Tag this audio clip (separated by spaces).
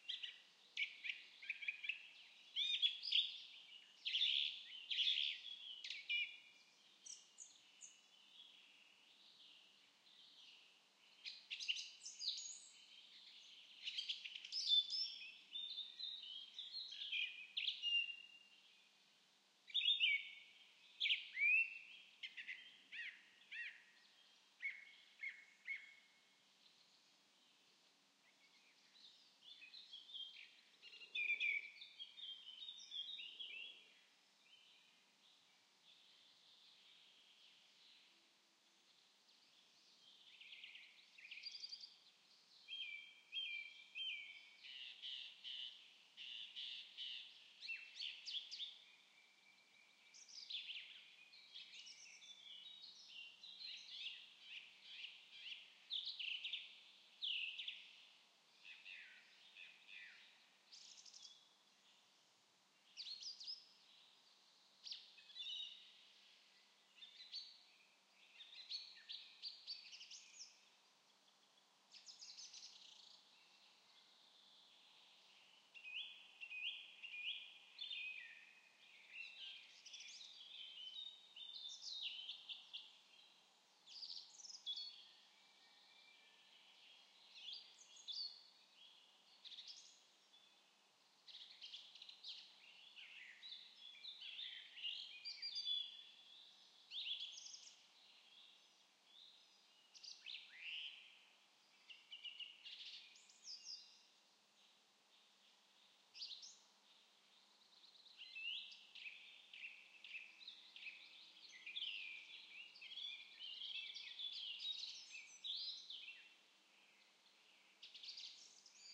poland bogucin birds bird forest pozna woods nature soundscape fieldrecording